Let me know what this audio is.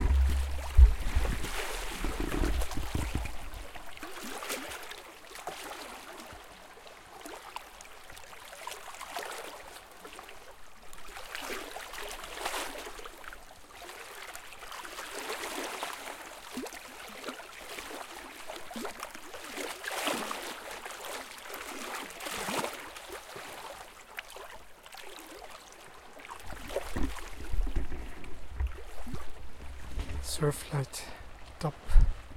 Light surf on lake shore in Patagonia. Recorded with a DR-40.